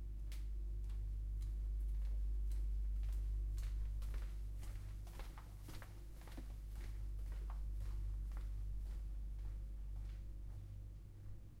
Walking, office floor
Walking on an office floor from one point to another. Note the stereo effect that happens when I walk past the microphone. Neat huh.
floor, fx, soundfx, shoes, feet, linoleum, walk, Foley, ambient, walking